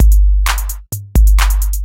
130 super vintage drums 03
old school vintage drum
club, drums, free, phat, vintage